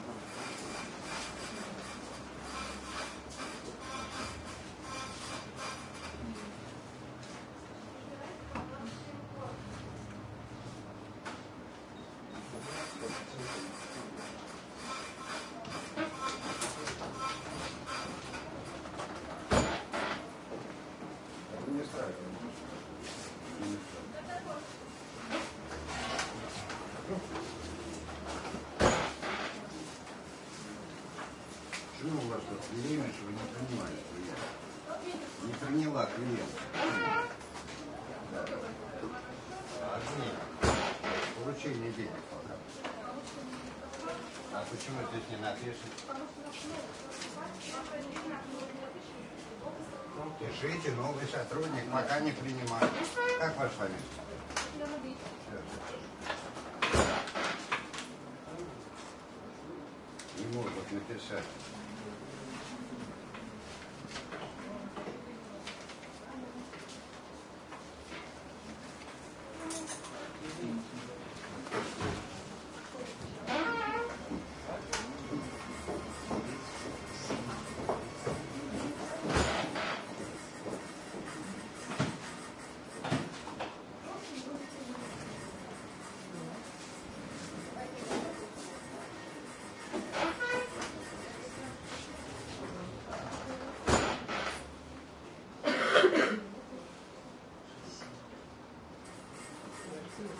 110416 14 Sber bank Ambiance Bank
Russian small bank
Ambiance, Bank, Moscow